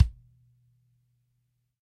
Kick-Coin-PlasticBeater-Medium3
These are samples I have recorded in my rehearsal room/studio. It's not a fancy studio, but it's something. Each drum is recorded with an SM57 on the top head and an SM58 on the resonant head, which have been mixed together with no phase issues. These samples are unprocessed, except for the kick drum which has had a slight boost in the 80hz region for about +3db to bring out that "in your chest" bass. The samples are originally intended to be used for blending in on recorded drums, hence why there aren't so many variations of the strokes, but I guess you could also use it for pure drum programming if you settle for a not so extremely dynamic and varied drum play/feel. Enjoy these samples, and keep up the good work everyone!
24, bass, bit, kick, medium, recorded, snare, soft